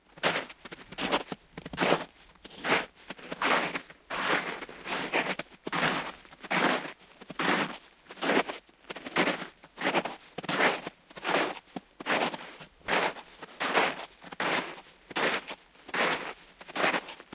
Snow footsteps 1
One person walking over crisp approx 5" deep snow. Recorded in Scottish Borders December 2009.
Sony ECM MS907 and Edirol R-09HR
wilderness, wild, Scotland, walking, ice, outdoors, winter, field-recording, December, Christmas, snow, hiking